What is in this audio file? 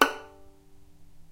violin pizz non vib F#5
violin pizzicato "non vibrato"
pizzicato, non-vibrato